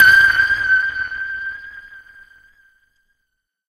SONAR PING PONG G
The ping-pong ball sample was then manipulated and stretched in Melodyne giving a sound not dissimilar to a submarine's SONAR or ASDIC "ping". Final editing and interpolation of some notes was carried out in Cool Edit Pro.
asdic, audio, ball, media, preamp, sample, scale, tennis